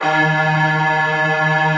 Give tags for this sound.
Combfilter; STrings

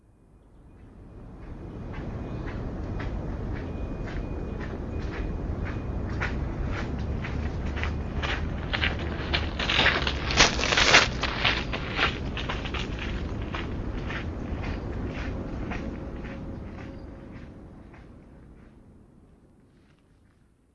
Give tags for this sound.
steps; walk; ulp-cam

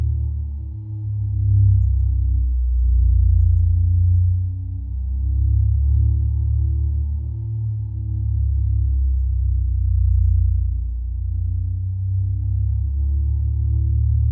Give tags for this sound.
bass; loop; tense; terminator

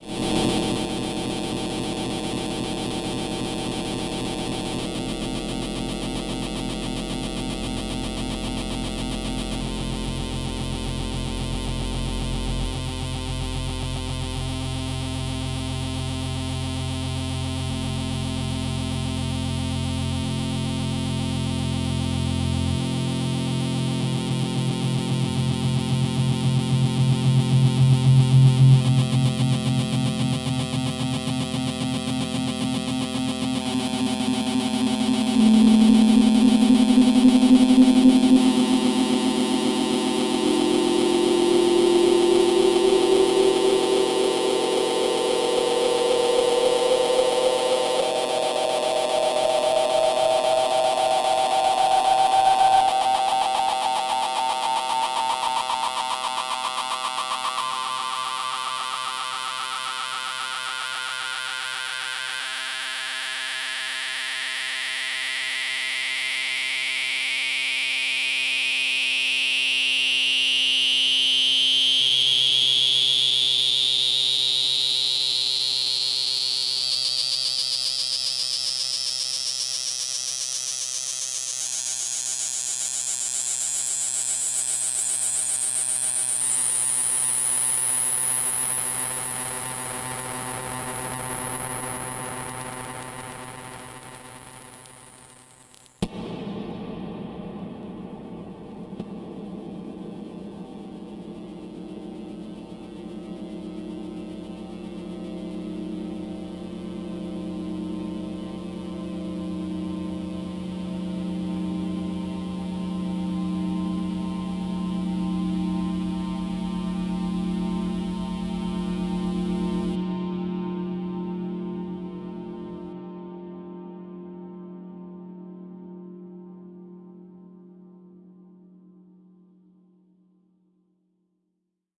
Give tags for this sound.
fx; effect

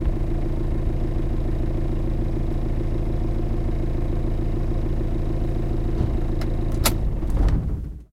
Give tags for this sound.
20; corolla; van; portugal; stop; esposende; toyota; car; engine

